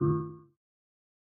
Button lustra ctlux bowl(3layer, filter)2
Sounding commands, select, actions, alarms, confirmations, etc. The initial sound was the sound of a ceiling lamp, extracted with a pencil, with subsequent processing. Perhaps it will be useful for you. Enjoy it. If it does not bother you, share links to your work where this sound was used.
game, beep, screen, effect, computer, command, switch, menu, sfx, GUI, interface, application, option, fx, typing, blip, confirm, click, signal, keystroke, bleep, select, UI, button, gadjet, film, cinematic